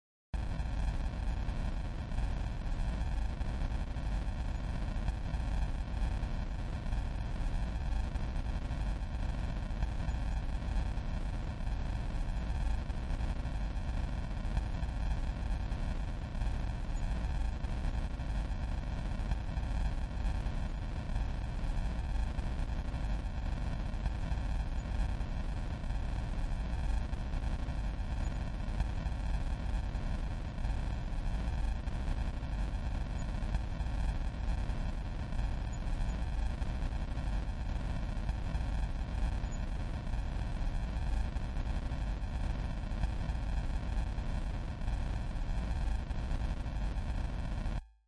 noise ambient
Just playing wiht brown noise...
ambience, ambient, atmosphere, brown-noise, dark, lo-fi, noise